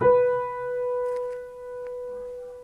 piano note regular B
regular, piano, note, b